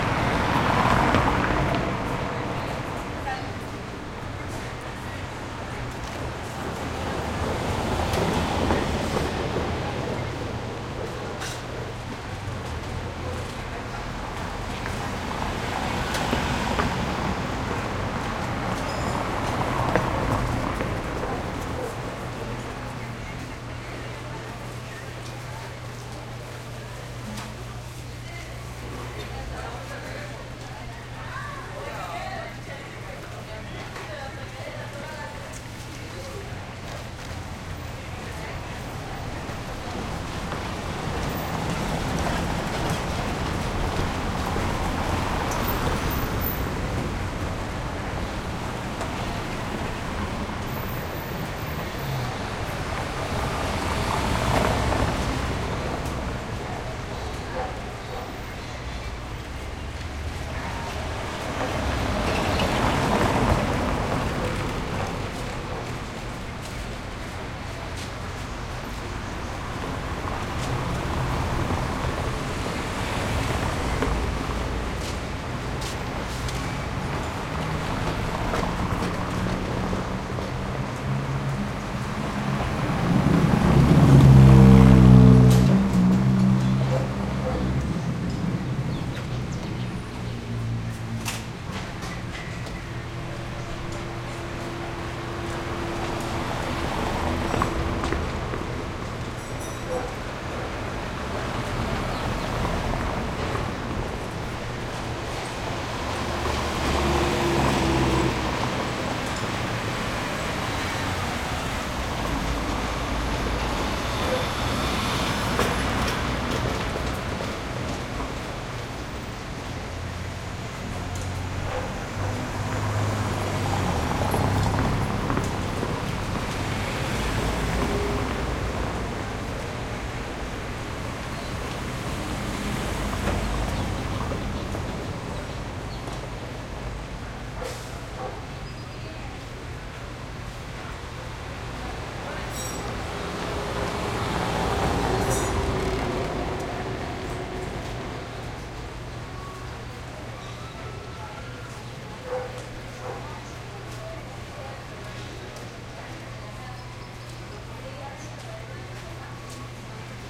traffic light slow cobblestone intersection Oaxaca, Mexico
Mexico, light, traffic, intersection, slow, Oaxaca, cobblestone